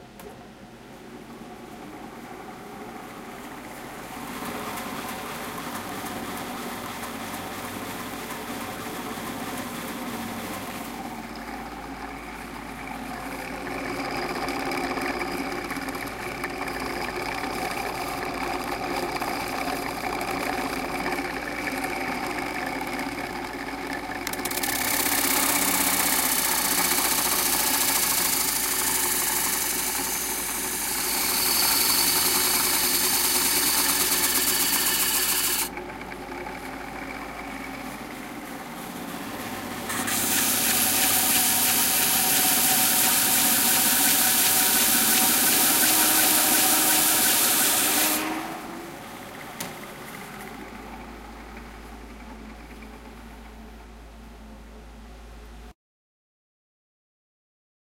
The sander in the wood shop at NYU's ITP. Sanding a block of wood a couple ways. Barely processed.
equipment, grind, machine, rub, sand, wood